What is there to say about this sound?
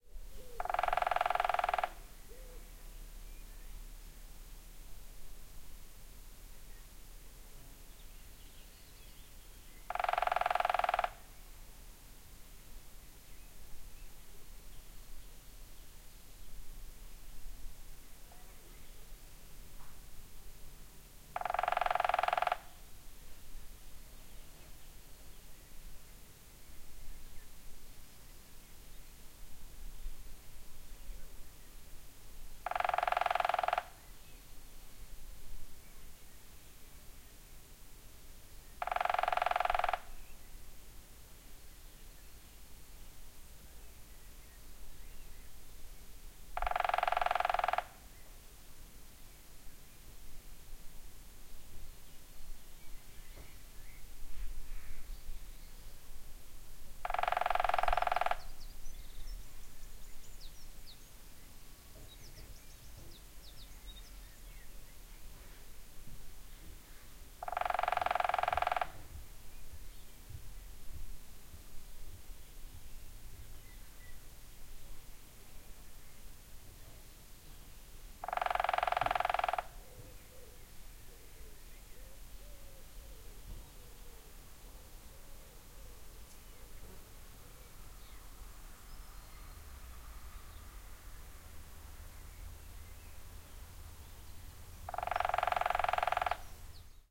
FX WOODPECKER
This is a woodpecker pecking at a tree recorded in the south of France (Le lot). Enjoy it!
pecking, bird, nature, woodpecker, forrest, ambience, field-recording